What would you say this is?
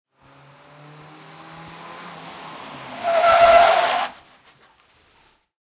SFX Car emergency brake
Car drives by and suddenly makes an emergency braking manoeuvre.
effect, car, tires, foley, sfx, stereo